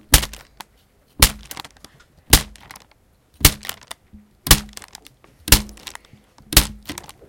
Mysounds LG-FR Ewan- measuring instrument and plastic bag
CityRings, France, Rennes